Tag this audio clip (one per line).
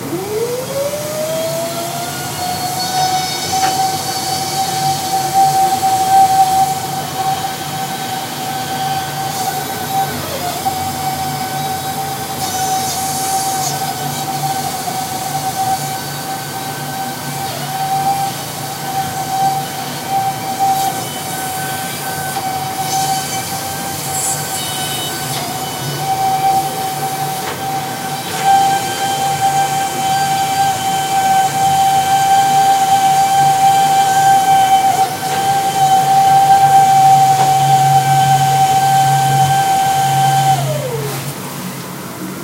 equipment
field-recording
machine
plumber